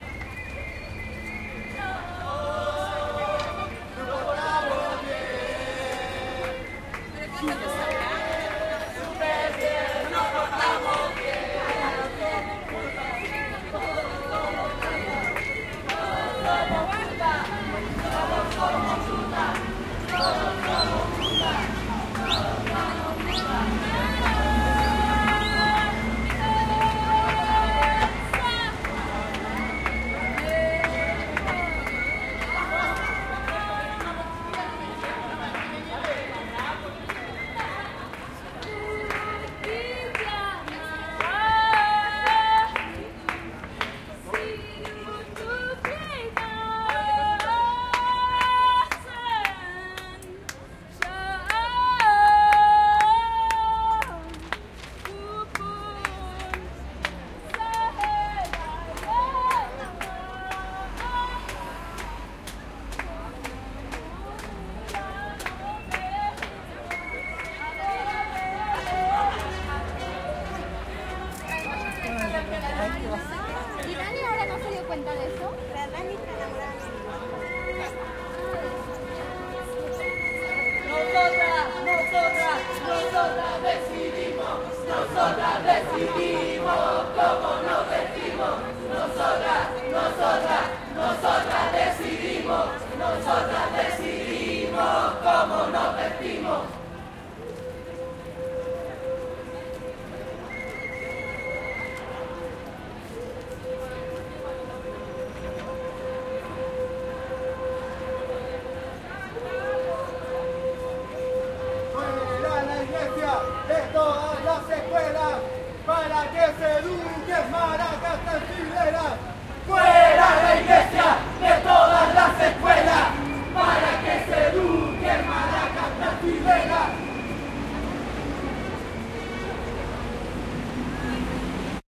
Nos portamos bien, no somos putas. Canto de una mujer que aplaude mientras se aleja. Nosotras decidimos como nos vestimos con una sirena de fondo. Fuera la iglesia, de todas las escuelas.